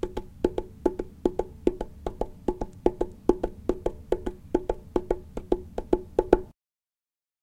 Hands/fingers hitting top of bike tire--like a horse running